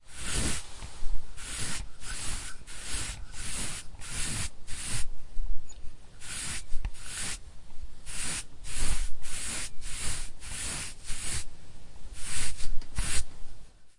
paint brush
brush, CZ, Czech, paint, Pansk, Panska